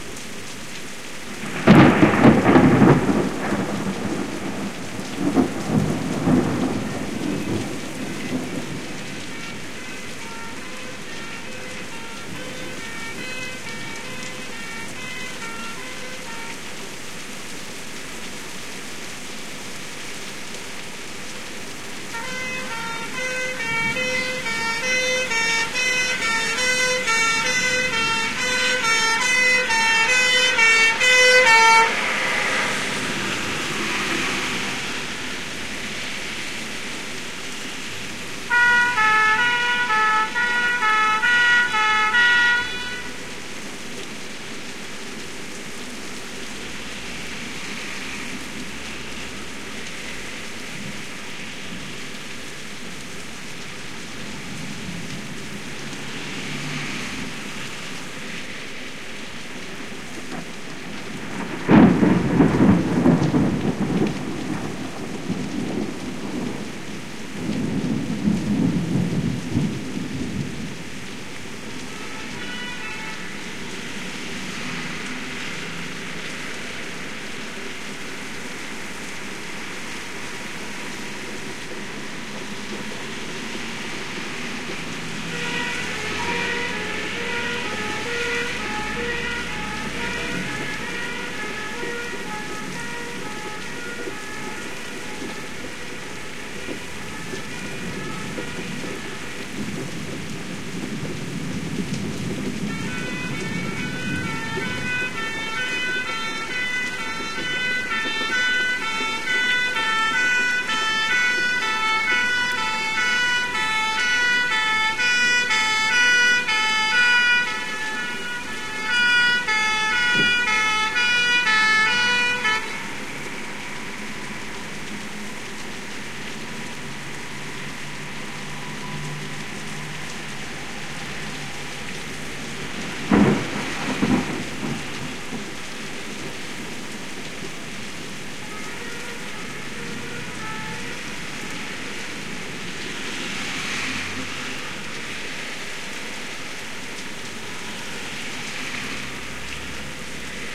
This is a recording made back in 1981 onto open reel tape dubbed to TDK Metal cassette tape.Two condenser mikes resting in a partially open window to record storm.... luckily for me, the recording was enhanced by the sound of a passing fire engine... in fact two!Tho it was raining so hard, I think the fire was probably out before they got to the scene!
engine, fire, fire-engine-siren, old-style-english-fire-engine-siren, rain-on-window, siren, storm, thunderstorm, thunderstorm-with-fire-engine-passing